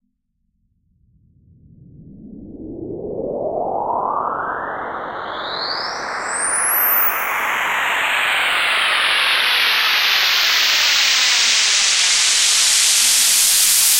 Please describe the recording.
Riser Noise 02
Riser made with Massive in Reaper. Eight bars long.
riser, trance, edm